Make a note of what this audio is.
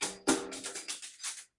This Hihatloop was recorded by myself with my mobilephone in New York.
Loop, Sample, Hihat, MobileRecord